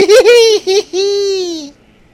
Strange "hahahaheehee" laugh. Recorded with an iPhone.